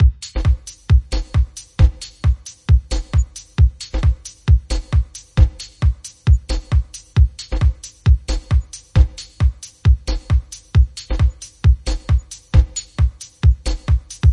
134 bpm -Techno Beat
This one is created by the buildin Sampler from Bitwig Studio 3.2.
It gives enough space for additional Instruments.
dry, techno, unprocessed